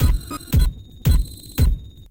groove1 114 bpm drum loop
drum, loop